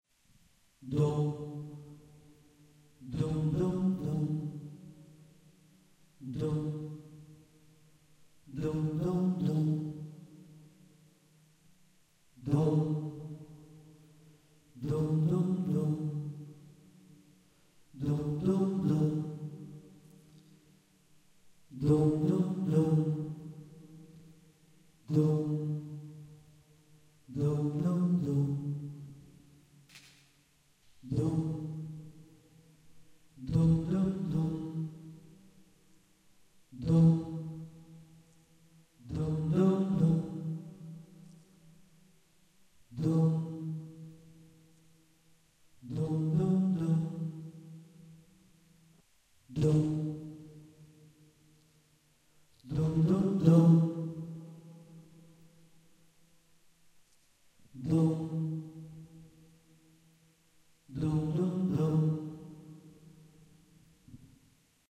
Dum dum dum ambience with the FX 3D
ambience, dum, vocal, voice